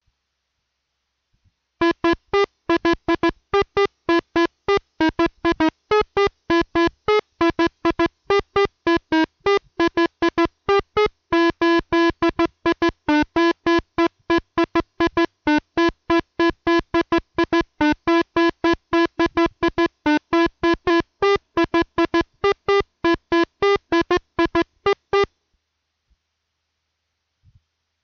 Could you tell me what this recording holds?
Sounds from a Korg Monotron Duo.
Battery, Ribbon, powered, Synth